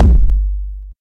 kick drum created by layering kicks and bass sounds, using fruity loops. some filtering and EQ- hard limiting and noise reduction.